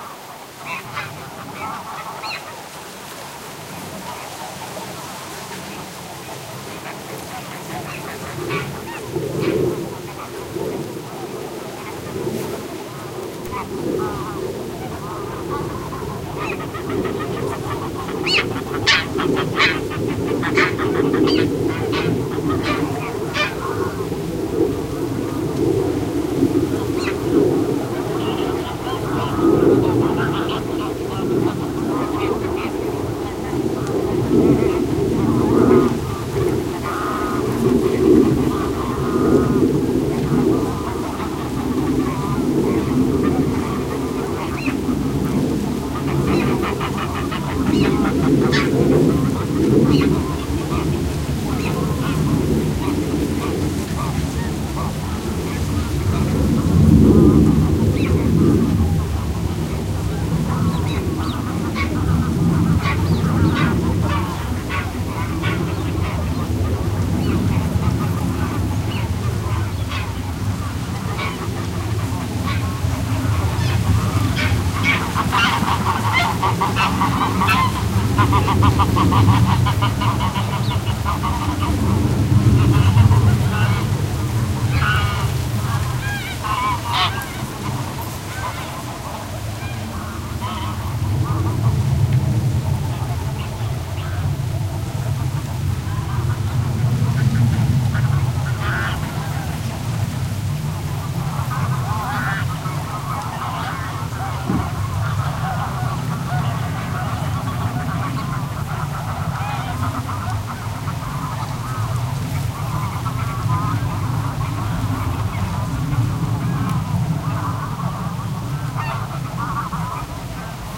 Greylag Geese honking, airplane overheading, and some noise of wind on reed and grasses. Sennheiser MKH60 + MKH30 into Shure FP24 and Edirol R09 recorder